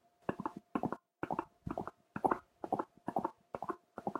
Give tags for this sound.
gallop horse ride

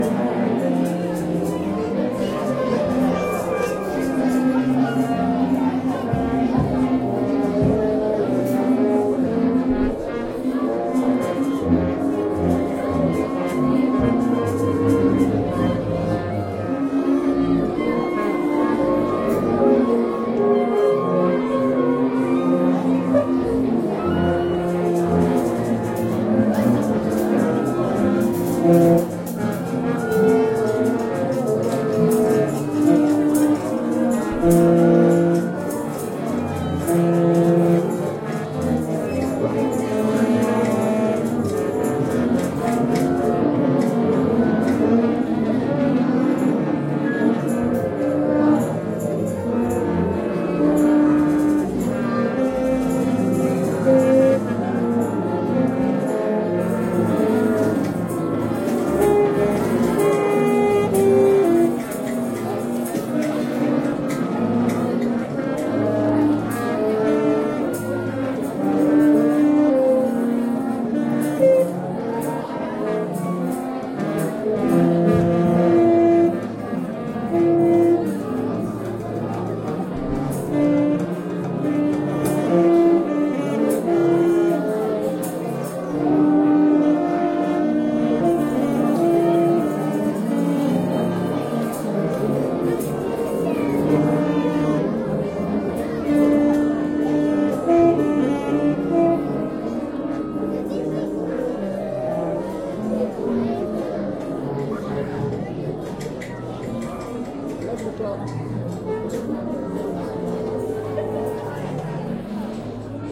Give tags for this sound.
bad-noise band musician indoors warm-up performance children hall music discordant

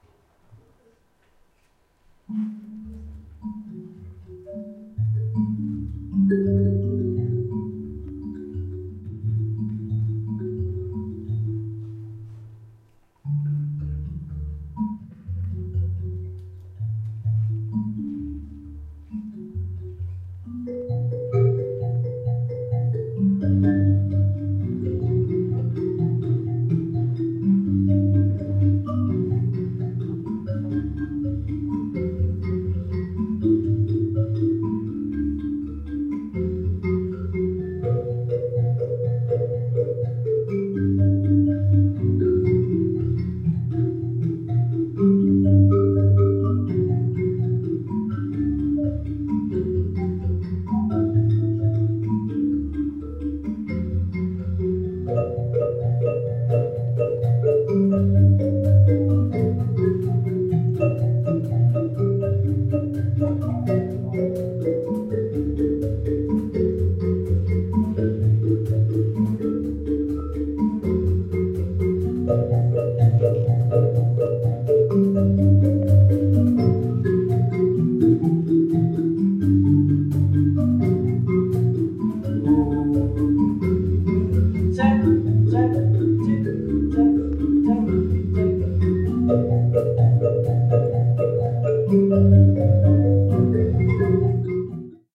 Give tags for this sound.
mbira stereo lamellophone thumb-piano musical-instrument plucked shona african xy zimbabwean

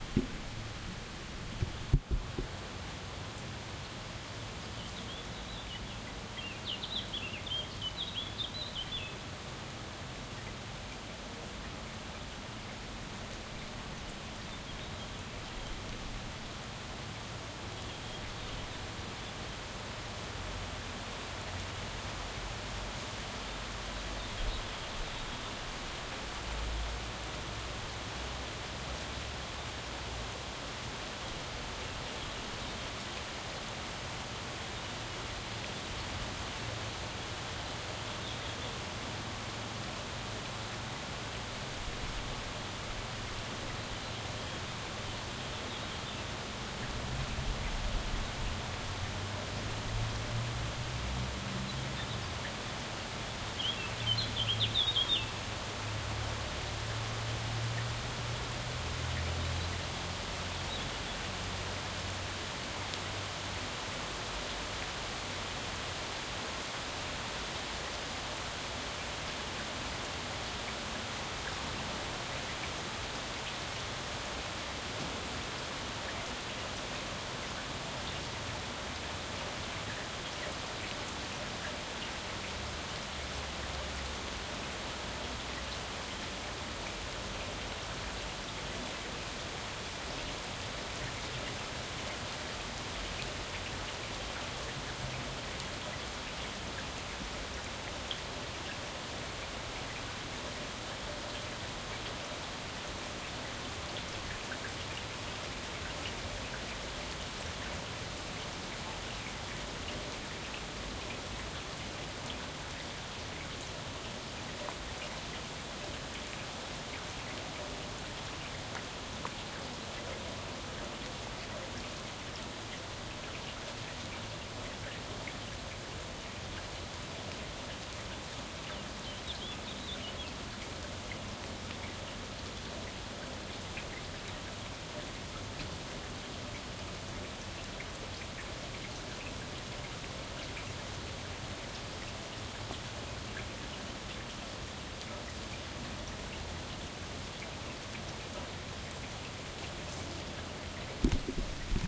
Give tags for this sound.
medium drain-pipe ambience rain field-recording

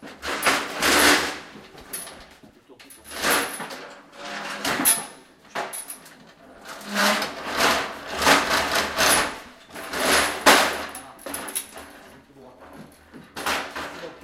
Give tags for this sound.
sonicsnaps La Binquenais Rennes

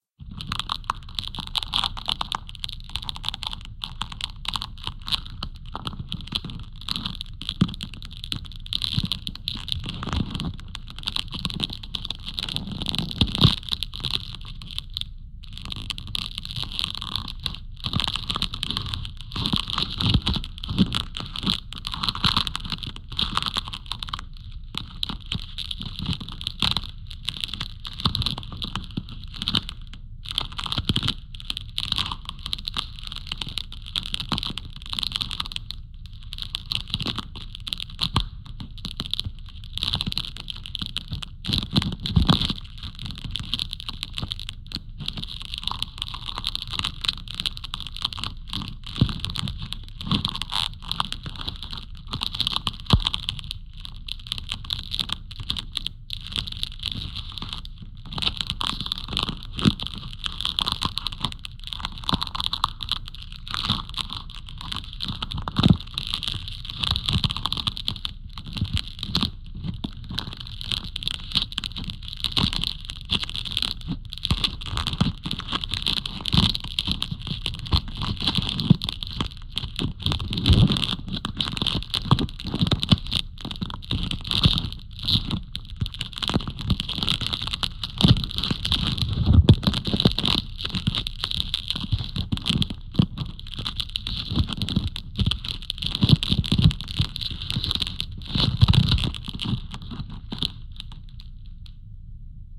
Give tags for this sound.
crisp fire processed real

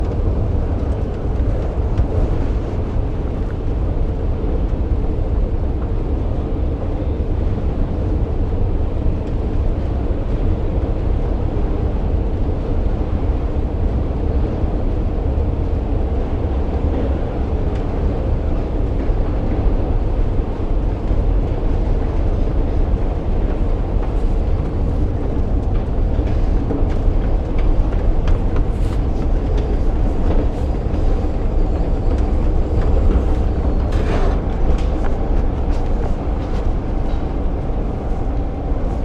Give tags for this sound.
ambiance ambience ambient atmos atmosphere background background-sound footsteps general-noise soundscape walking white-noise

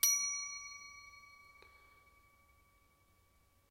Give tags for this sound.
metal resonate tinkle

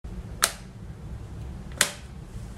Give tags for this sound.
Button Light On Switch

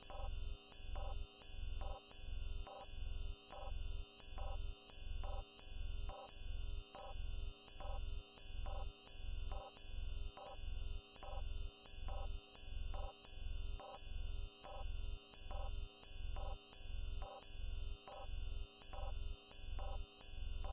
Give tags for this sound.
Sci-Fi Electronic Bells Space Alarms Futuristic Noise Whistles

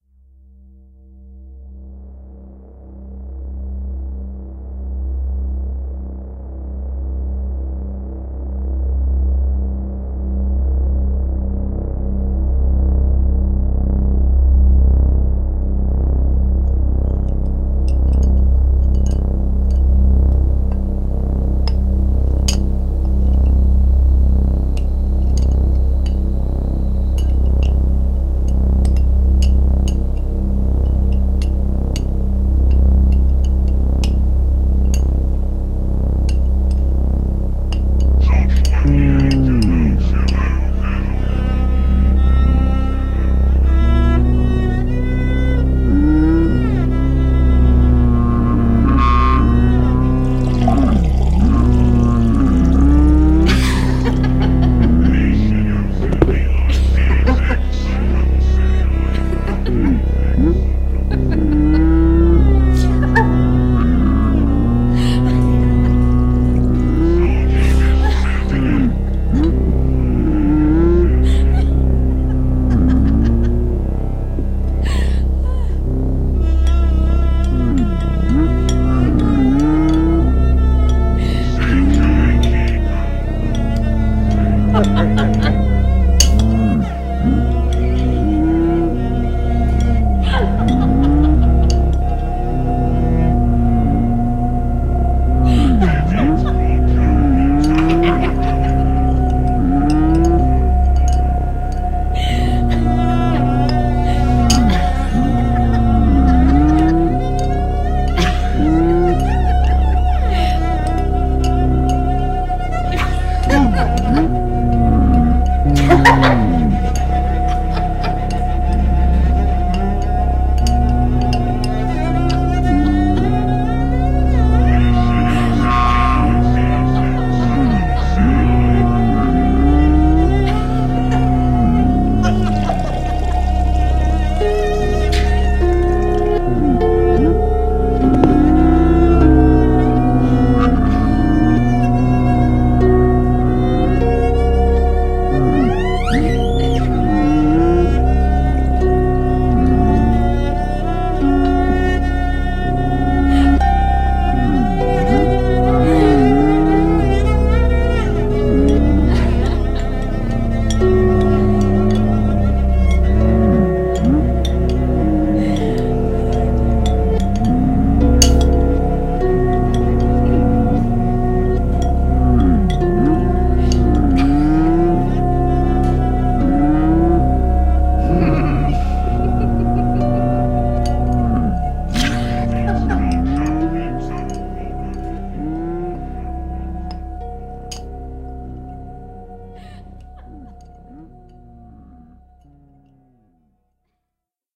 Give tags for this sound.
spooky ambient evil-tea-party dreamlike horror strange eerie Halloween demonic distant haunted uneasy

